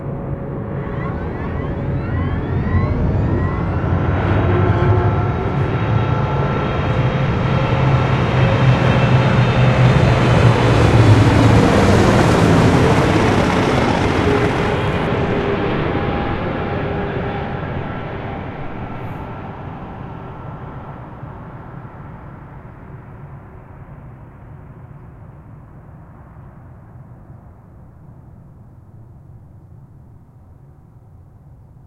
Some Ship
My goal with learning sound is creating immersive soundscapes and imaginative moments. I want to create fantastic art, and I can’t reach the peak of my imagination without help. Big thanks to this community!
Also, go check out the profiles of the creators who made and recorded the elements of this sound:
Engine, Machine, Plane, Ship, Spaceship, Transportation